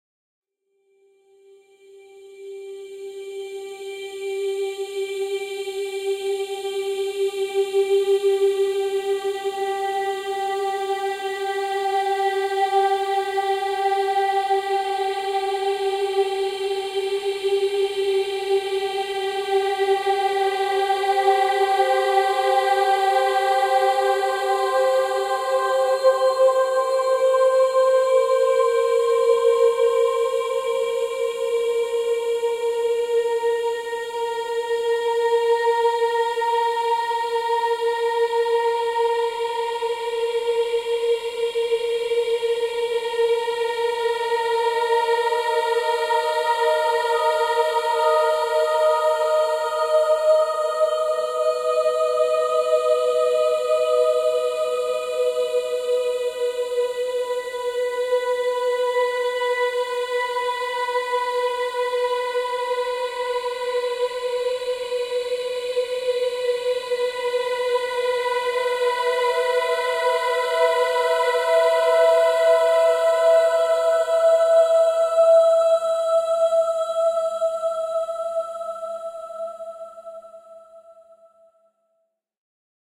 Processed female voice singing high notes.